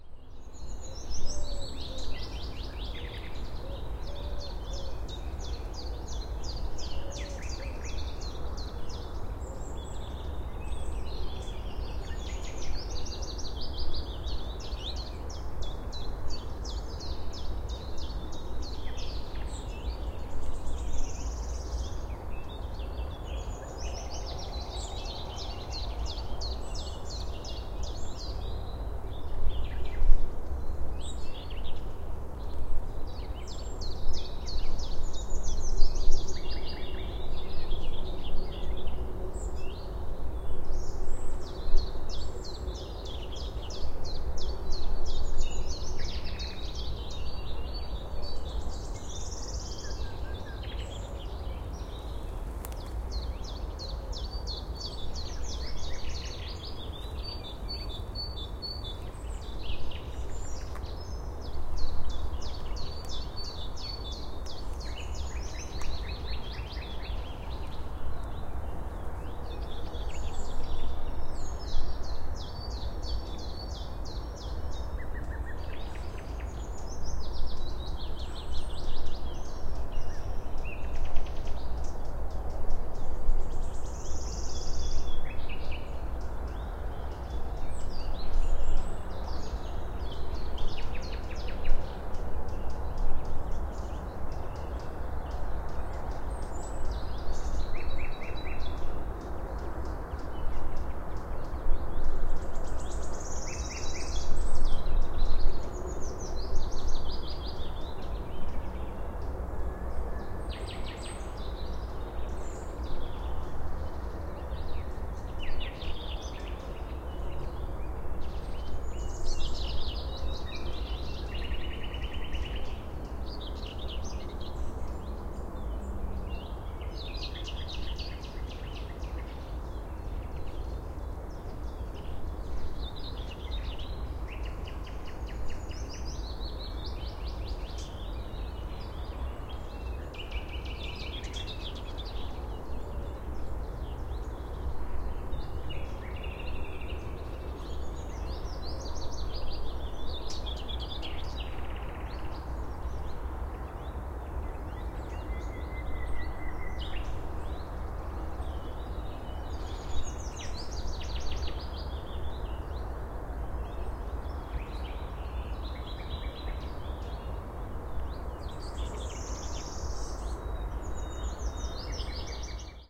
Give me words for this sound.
zakrzowek natural ambiance birds
Field recording of Zakrzówek, Kraków
zoom h6
soundfield mic